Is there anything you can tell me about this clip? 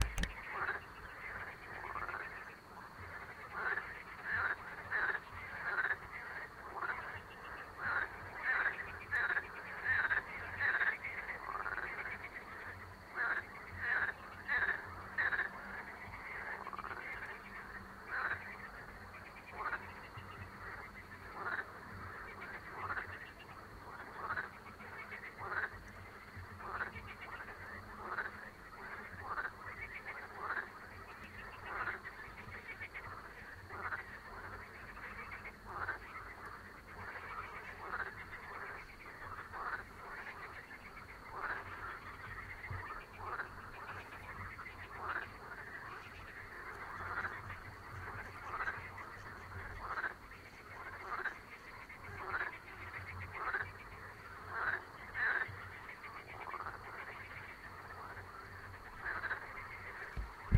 Babble of Frogs 003
Lots of frogs making noise in a french lake. Some insects and cars can be heard in the background at times.
Croaks, France, Frogs, Water